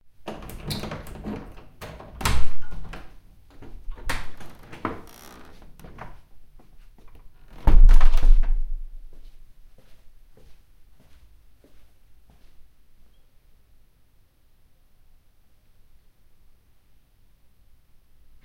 Door Steps 1
A person unlock, open a door and step inside
corridor, door, key, lock, steps